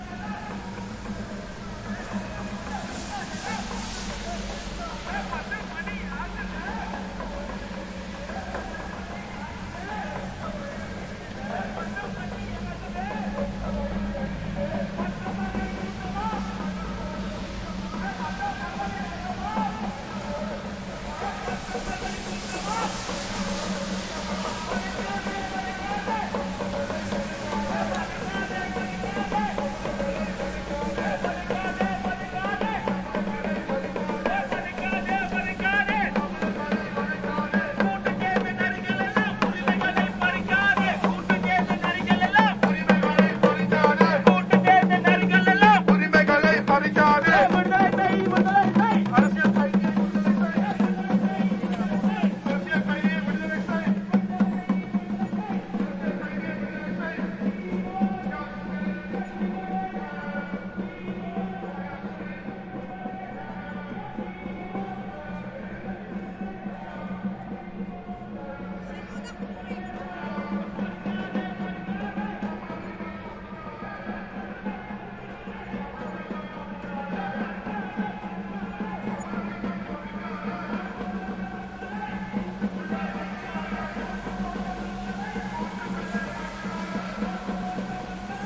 Walking Past Demonstration (Sri Lanka)
ambient, city